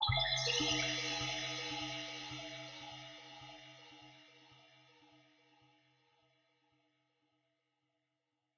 Result of a Tone2 Firebird session with several Reverbs.
atmosphere, ambient, reverb, dark, water, cave